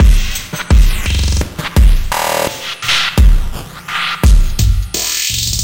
Loop without tail so you can loop it and cut as much as you want.